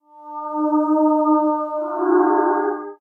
Sonified stock prices of Microsoft competing with Google. Algorithmic composition / sound design sketch. Ominous. Microsoft is the low frequency and Google the higher.